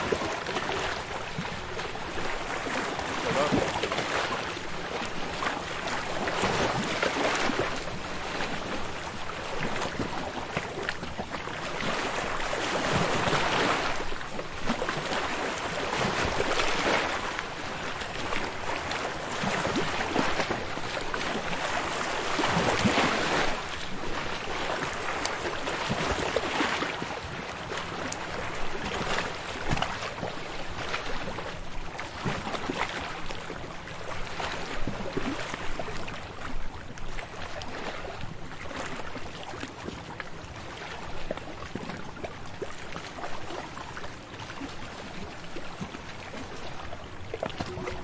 Field record of the Lac Leman at night
field-recording, lake, nature, night, summer, walkers